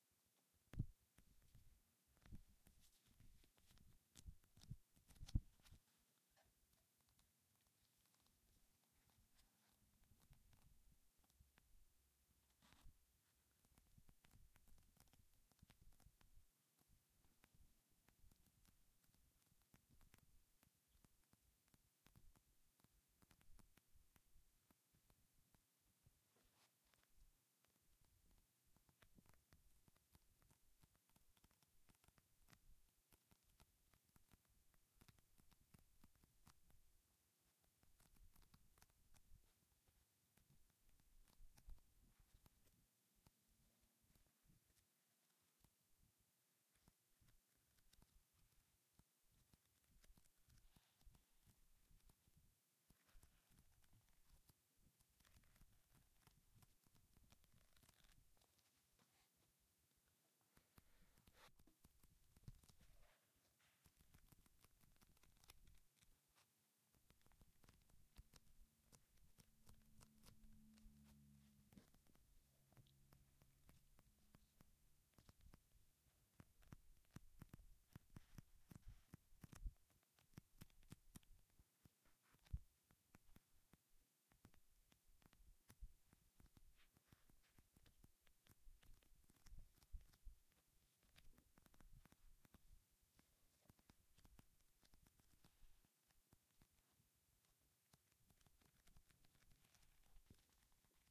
1m31s page flipping
Short page flipping and finger on paper sounds first part is a large paperback and second is a small journal. Recorded on AKG D5 through Audacity with slight amplification added.